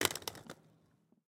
Skate-concrete 7
Concrete-floor, Foleys, Rollerskates